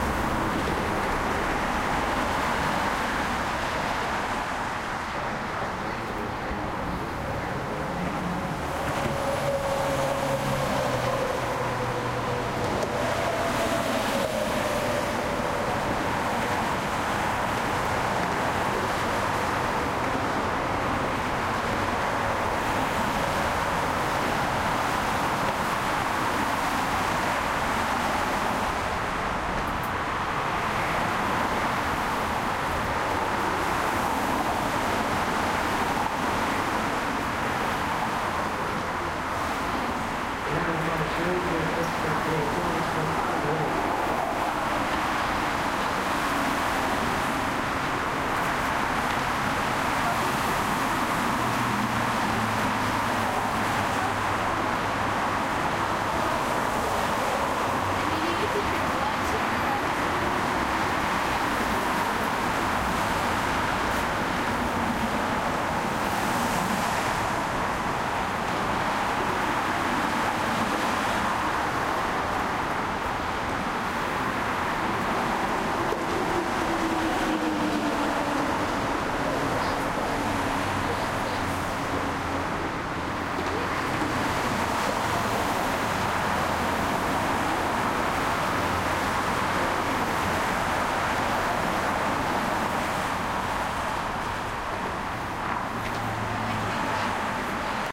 Traffic on freeway recorded from the station, Rockridge